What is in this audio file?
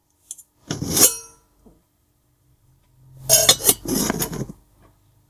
Me opening and closing a hollow metallic lid
Recorded using HDR PJ260V then edited using Audacity